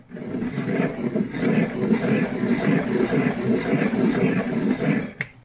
sharpening pencil
Sharpening a pencil with an x-acto wall mount suction cup sharpener
pencil, sharpener, x-acto